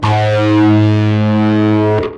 Recorded direct with a Peavey Dynabass in passive mode, active mode EQ is nice but noisy as hell so I never use it. Ran the bass through my Zoom bass processor and played all notes on E string up to 16th fret then went the rest of the way up the strings and onto highest fret on G string.
bass, electric, guitar